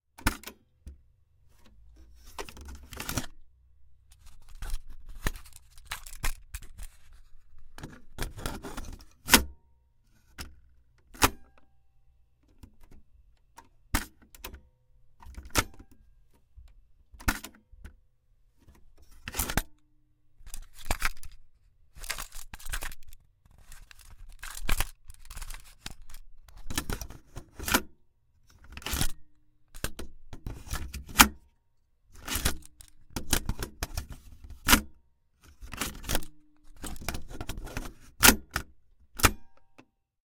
cassette tape deck open, close +tape handling
cassette, close, deck, open, tape